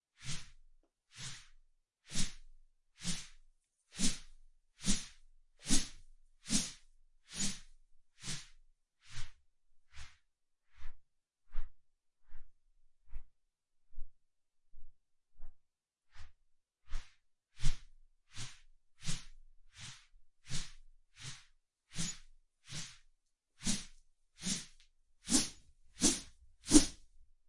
Woosh Miss Close (denoised)
this a denoised version, I also uploaded the raw.
I swang a straw broom.
swoosh, woosh, wip, wish